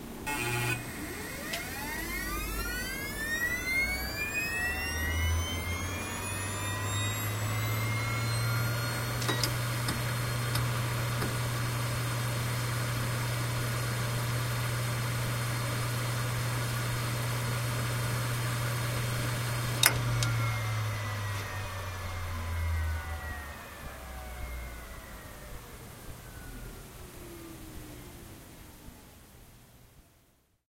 Hitachi DS T7K500 - 7200rpm - FDB
A Hitachi hard drive manufactured in 2006 close up; spin up, and spin down.
This drive has 3 platters.
(HDT725050VLAT80)
hard, rattle, machine, drive, disk, motor, hdd